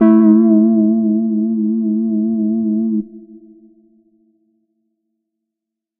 another simple pad